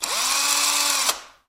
Electric drill 2
building, carpenter, construction, drill, drilling, electric, electric-tool, factory, industrial, machine, machinery, mechanical, tool, tools, work, worker, workers, workshop